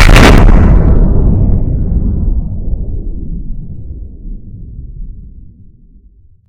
Yet another simple explosion (not real).